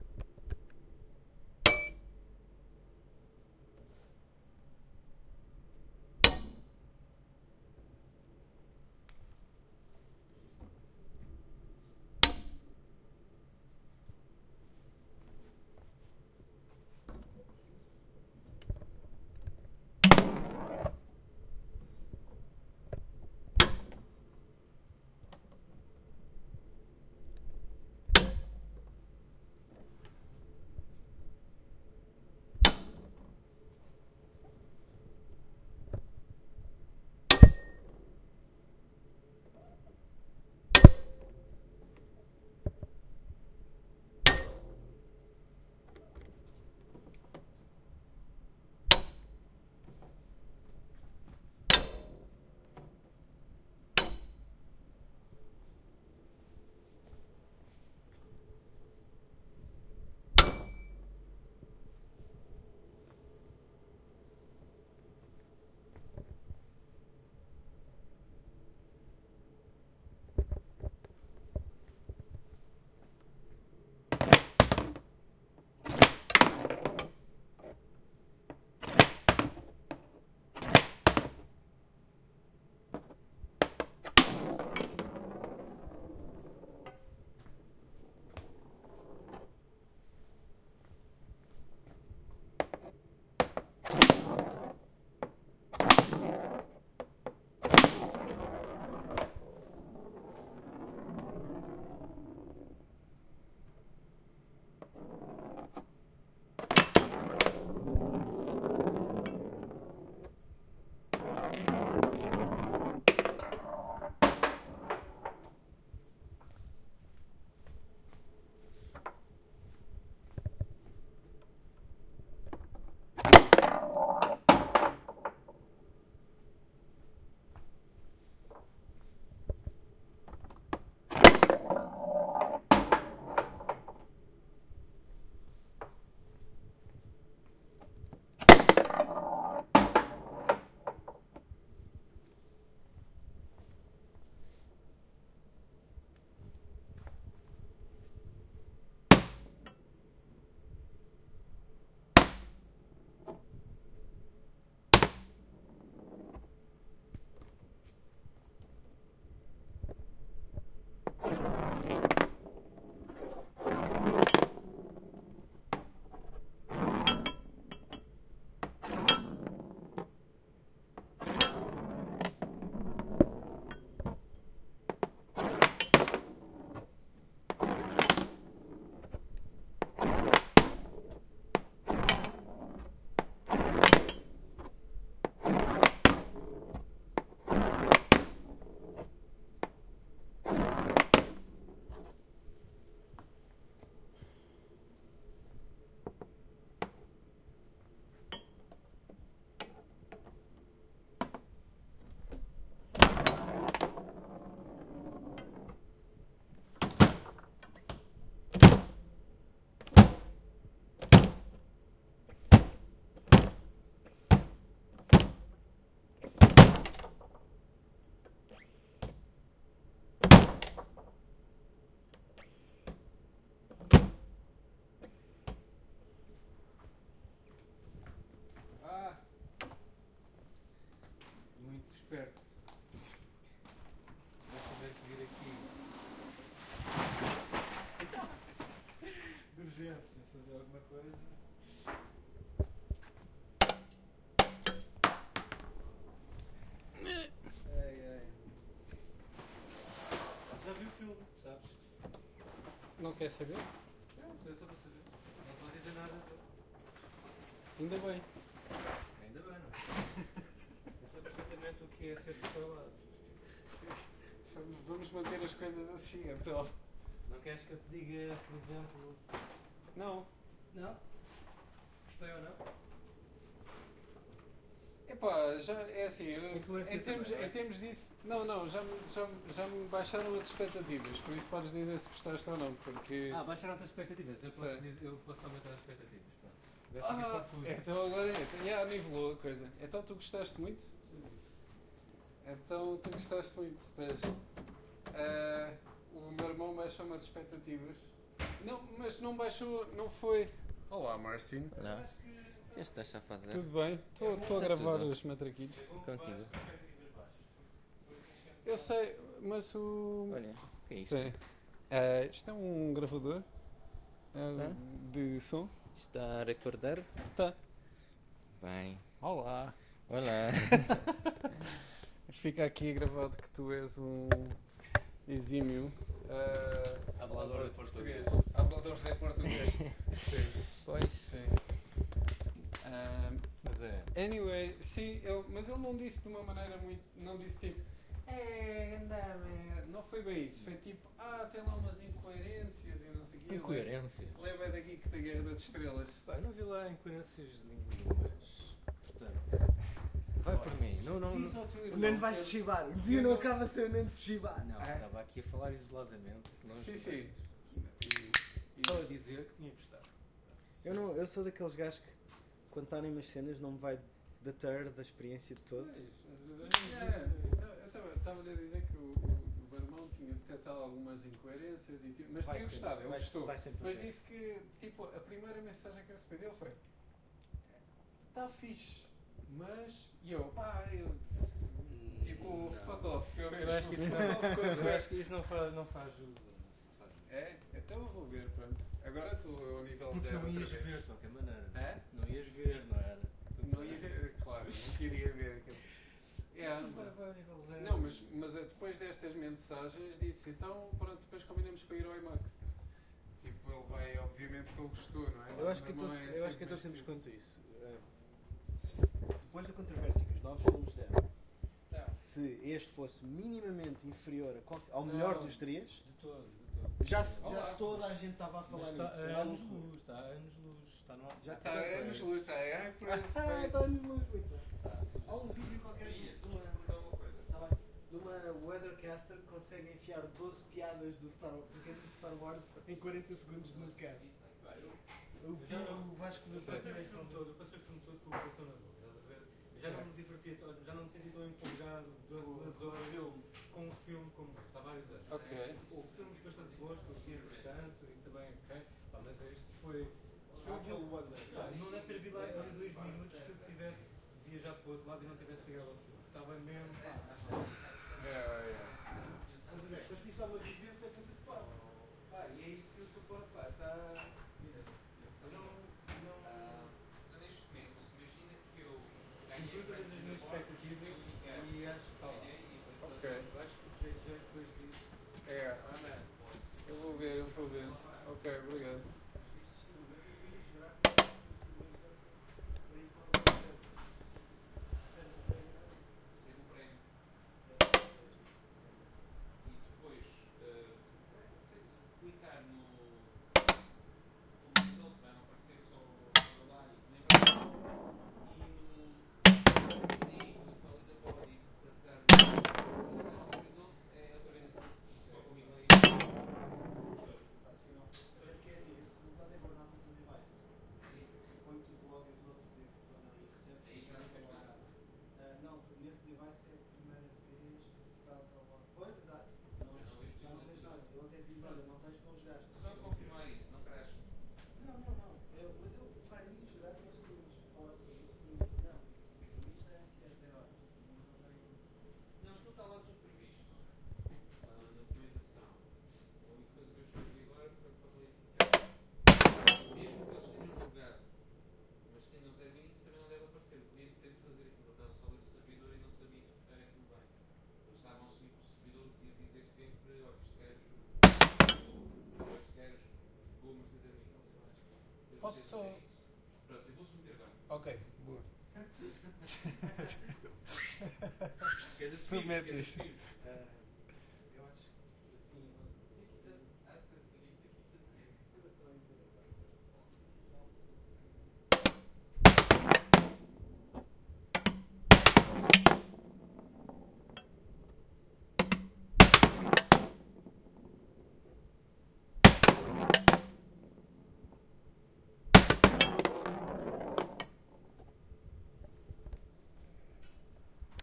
Foosball sounds
Recording of a classic Portuguese foosball table. Various individual samples, hits and ball rolling. Recorded with an H2.
foosball, leaden, matraquilhos, matrecos, mesa, portuguese, table, table-football